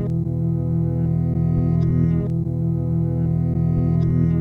loop guitar 01
This sample pack are the result of an afternoon of experimentation
engraved with a MPC 1000, is an old guitar with the pedal Behringer Echo Machine, I hope you find it useful
Este pack de muestras, son el resultado de una tarde de experimentación
grabado con una MPC 1000, es una vieja guitarra con el pedal Echo Machine de Behringer, espero que os sea de utilidad
echomachine, Guitar, MPC